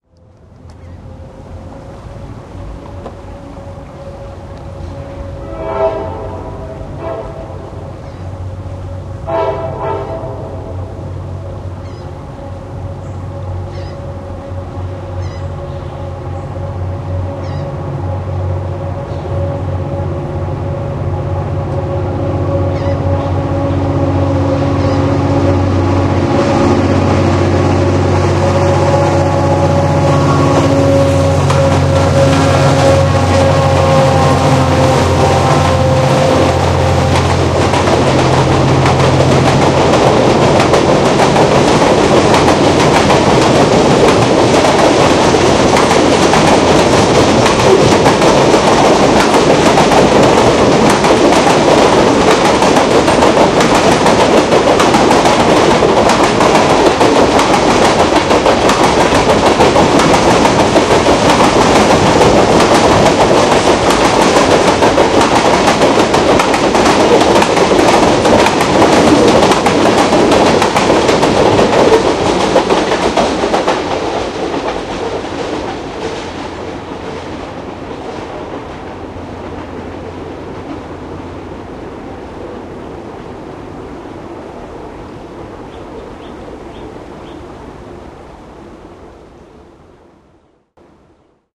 A diesel locomotive pulling about a dozen cars passes with whistle and the clacking of the wheels on the tracks. Stereo recording with Edirol R-09